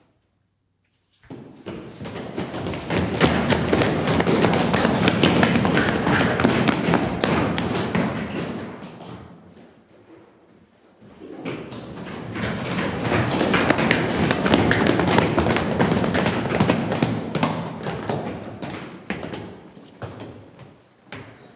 Scattered running in auditorium
Scattered running in an auditorium
auditorium, court, footstep, gym, gymnasium, running, shoes